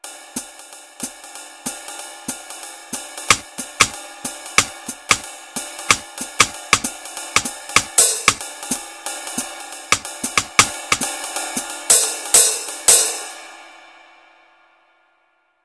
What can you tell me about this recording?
jazz beat using an SPD-20